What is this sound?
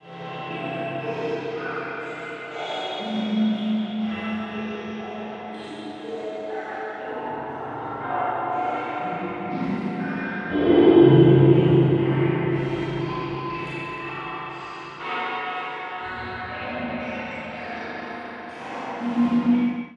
dimensioned sound from analog synthesis